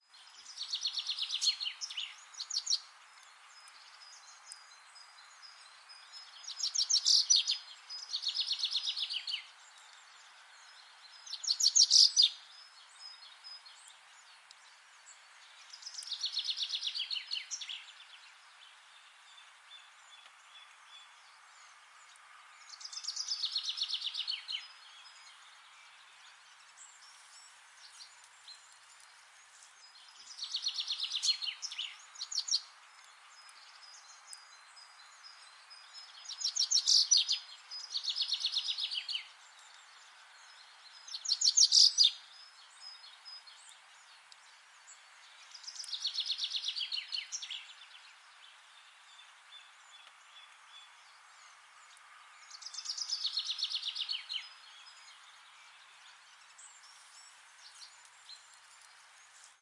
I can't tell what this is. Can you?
Forest Bird Sounds
A small loop of birds in the forest. High Pass Filter added with Cubase 5 to remove hum and distant car sounds. Recorded with Zoom H2n on March 18th 2015 in a forest in Germany (see Geotag)
birds, chirp, field-recording, forest